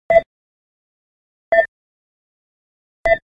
Store Scanning Items at Checkout
ambience, can, checkout, clink, clunk, cooling, crinkle, food, produce, store